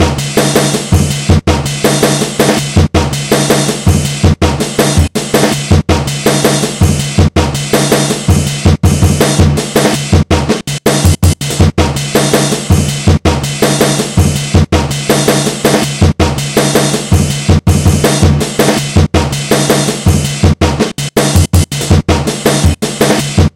variation of "apox-A" : vst slicex reconstuct sample" and soundforge 7 for edition